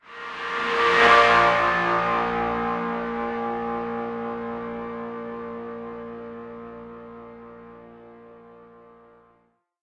A thin, heavily distorted guitar chord with preverb effect.